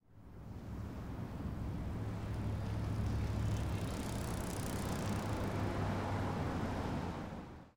Bike Pass w Traffic
A bicycle passing by with traffic in the background.
Recorded with a Sennheiser 416 into a Sound Devices 702 Recorder. Used a bass rolloff to remove rumble. Processed and edited in ProTools 10.
Recorded at Burton Chace Park in Marina Del Rey, CA.
Transportation, Park, Bike, Field-Recording, Traffic, Bicycle